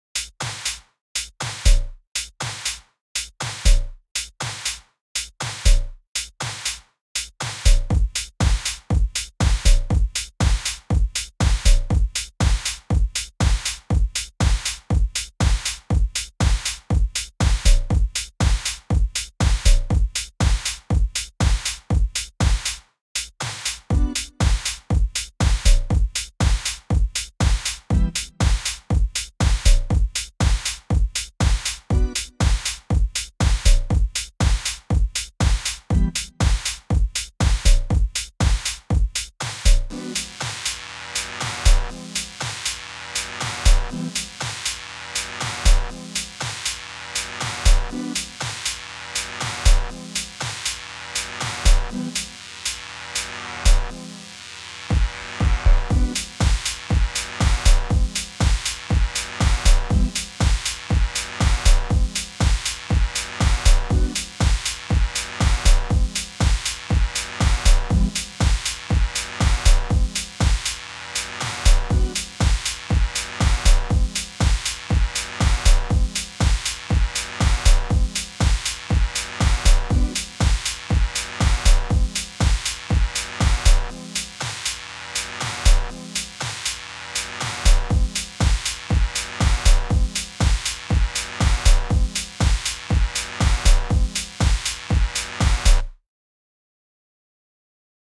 120 bpm 909 House beat pattern
Simple house loop made in FL Studio 11
120, bpm, techno, beat, electro, house, pattern, loop